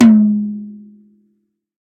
This is an 8" tom drum off the Mapex Mars drumkit, designed to be used in a General MIDI programme 117 (melodic tom) sampler.